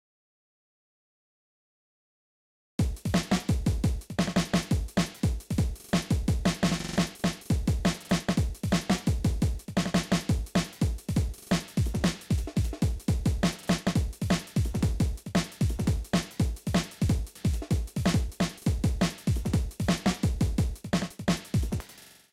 Chopped up breakbeat 172BPM
breakbeat, samples, edit